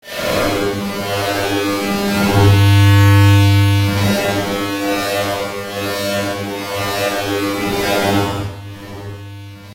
Loud sine wave sample with flange and phase changes,
and then processed with the harmonic generator in the
Audacity.